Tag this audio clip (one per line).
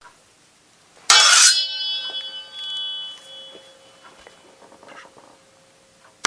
blade
clash
impact
medieval
metal
slide
sword
weapon